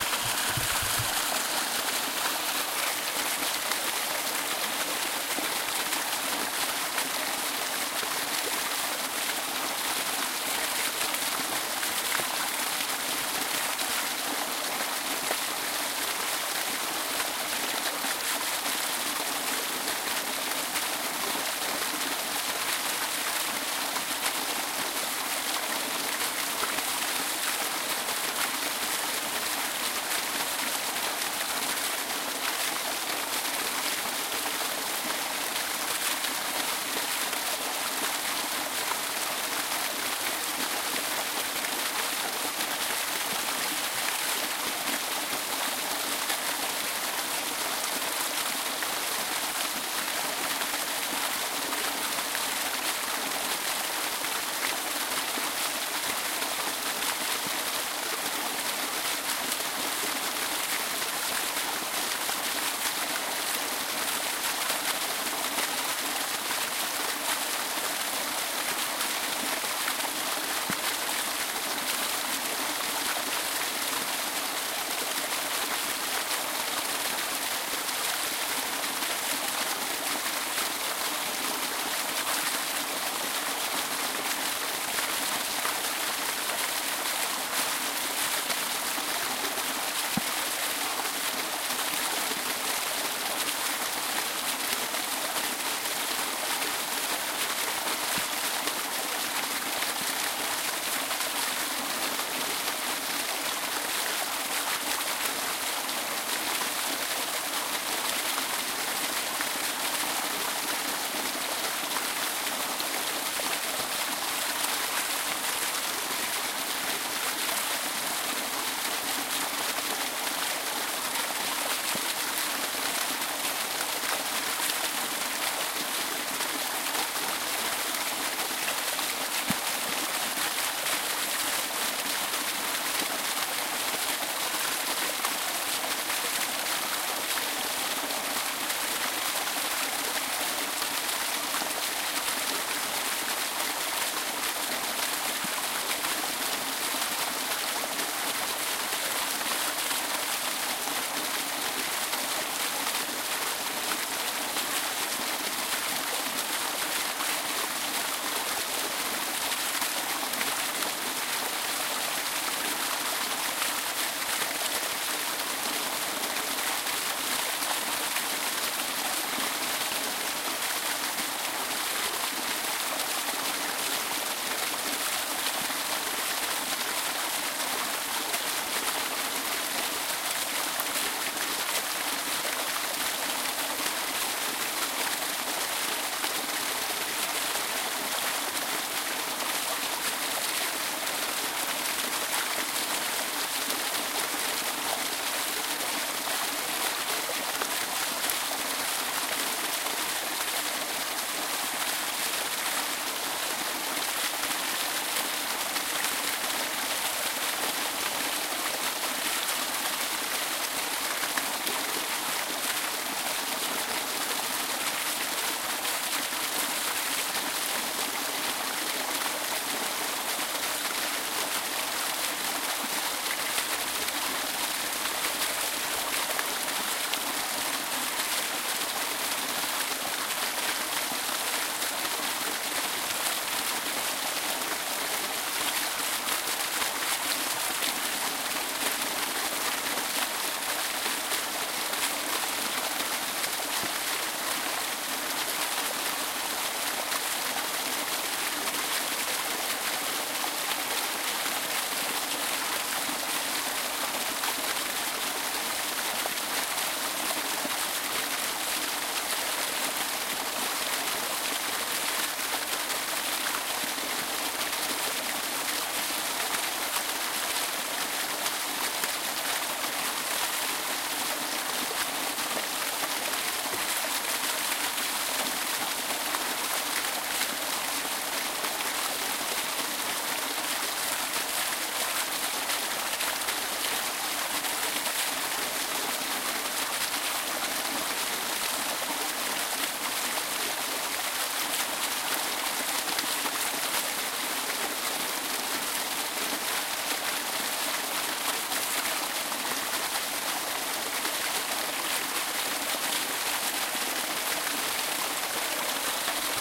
waterfall in the forest